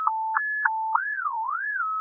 I took some waveform images and ran them through an image synth with the same 432k interval frequency range at various pitches and tempos.
synth, loop, pattern, image